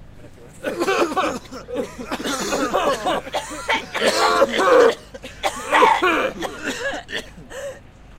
coughing-group
A group of people coughing outside.